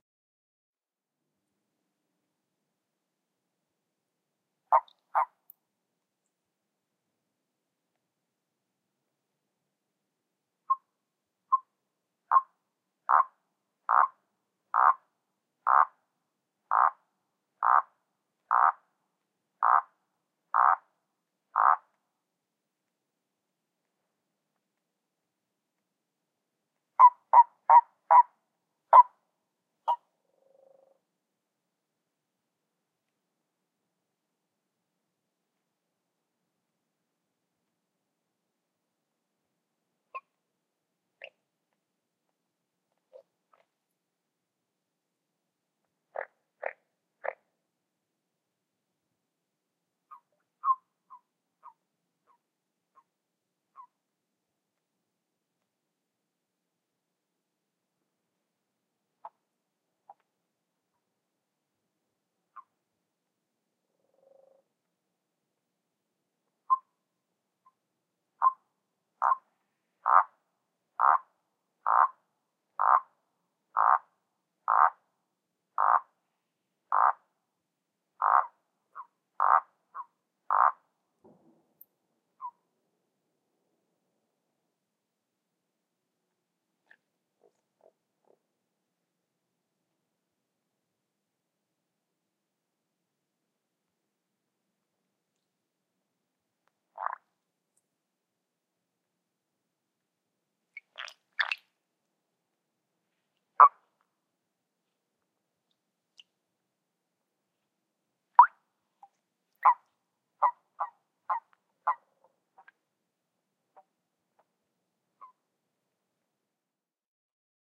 Toads Sh
A stereo field-recording of toads (Bufo bufo) in a pond during the mating season.Also present is a quiet frog and a distant sheep . Sony PCM-M10